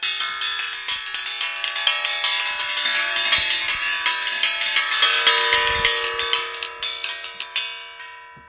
Metal object ringing
brush, hits, objects, random, scrapes, taps, thumps, variable